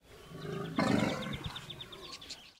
Elephant-Rot
Noise from elephant recorded on DAT (Tascam DAP-1) with a Sennheiser ME66 by G de Courtivron.
tanzania, water, bush, elephant, africa